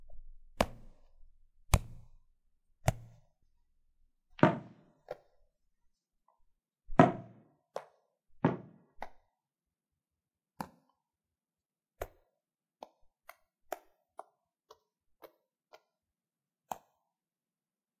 ball handling1
Sound of a stress ball contacting with hands.